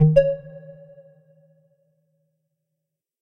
GUI Sound Effects 078
GUI Sound Effects